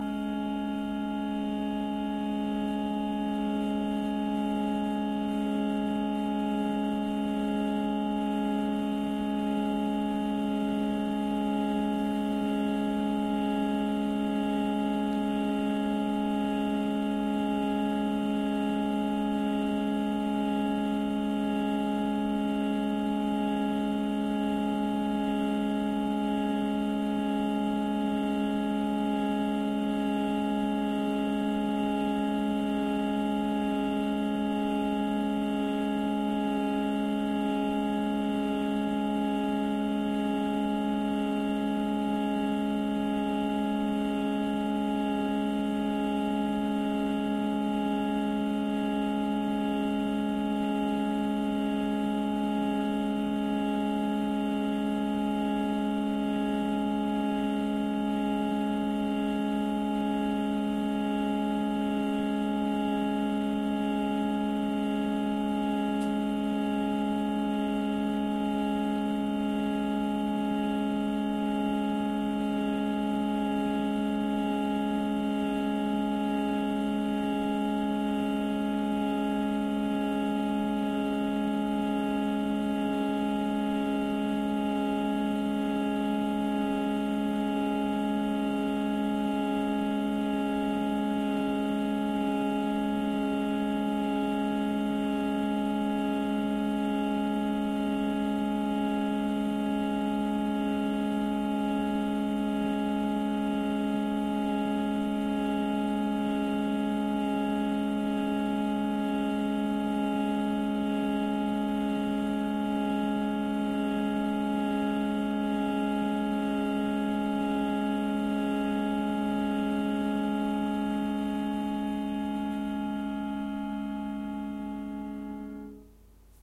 Harmonium Drone1
Medium tone drone from my Paul company (India) harmonium. Recorded with Zoom H4N via onboard mics, 3 feet in front of harmonium on same (floor) level. Edited with Audacity 2.06. Recorded on 2-11-15 at 2:35 pm, Mountain Time.
ambient, drone